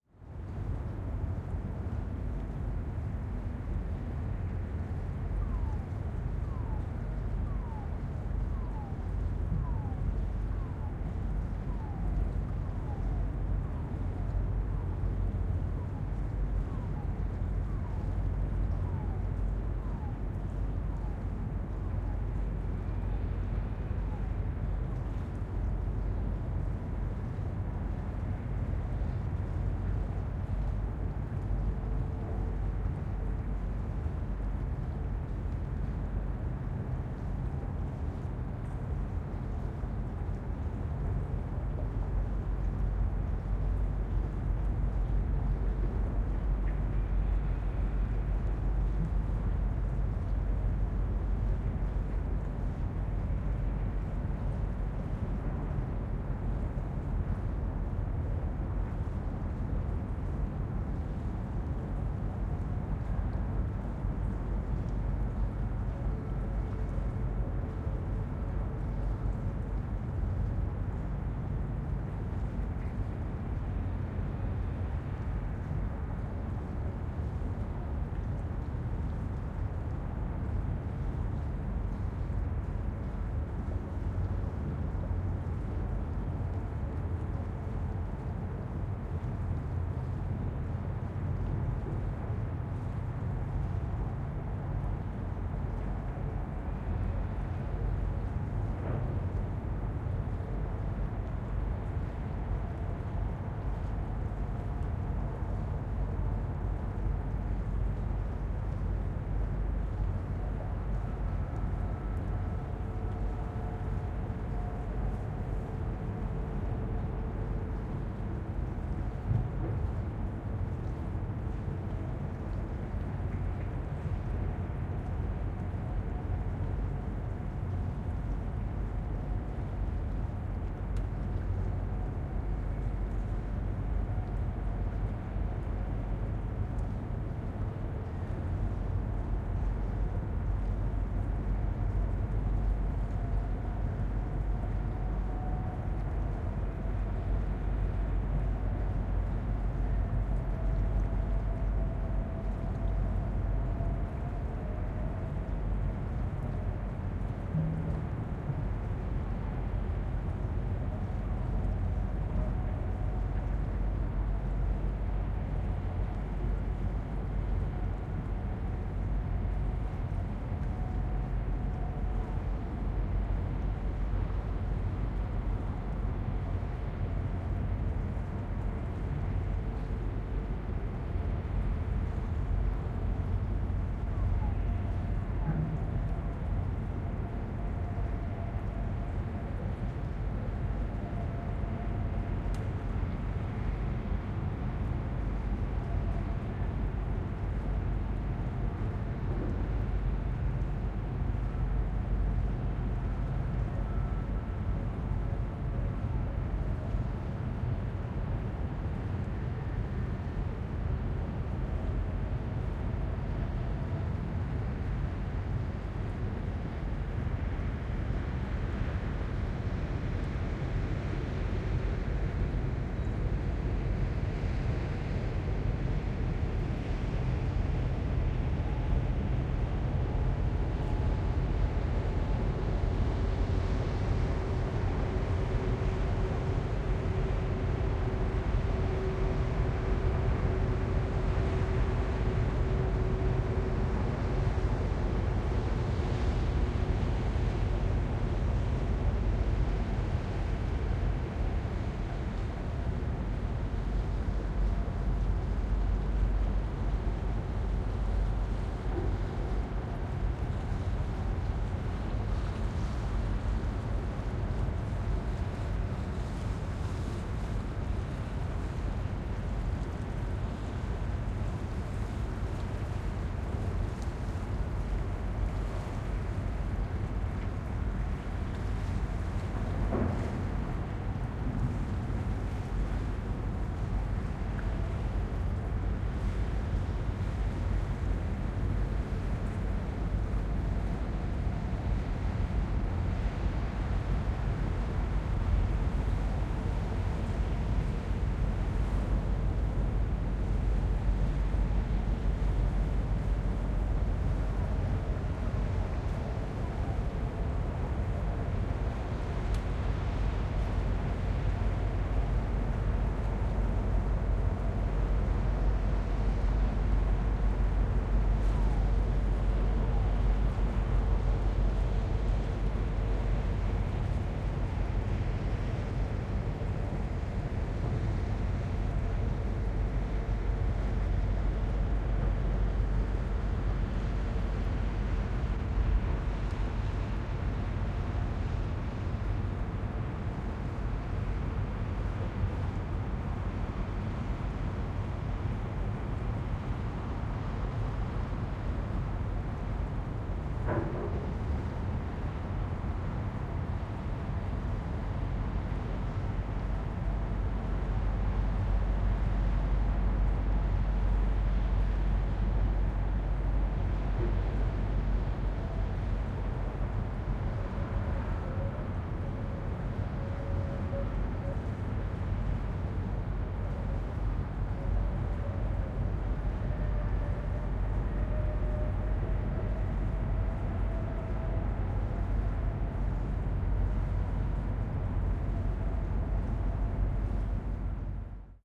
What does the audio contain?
Hamburg City Harbour #3
Sounds of the busy Hamburg harbour at night as heard from across the Elbe river, general noise, sounds of container cranes and transporters with their specific siren sounds can be heard from time to time. wind noises of the nearby buildings increase over the duration of the recording.
blends well with the other 2 recordings of the "Hamburg City Harbour" pack.